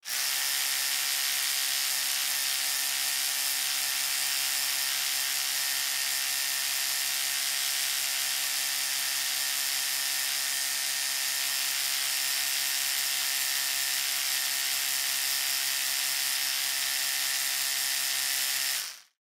Electric Toothbrush
Tested out my new Zoom F8 with a Slate Digital ML-2 Cardiod Smallcondenser-Mic. I decided to record different sounds in my Bathroom. The Room is really small and not good sounding but in the end i really like the results. Cheers Julius
noise, treble, cleaning, toothbrush, field-recording, slate-digital-ml2, Zoom-f8, motor, electric, high, bathroom, mouth